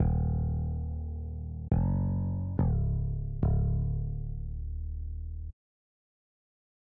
Transition Bass
I just made a quick little "Breaking Bad" inspired transition. Nothing fancy.
breaking, gaming, sound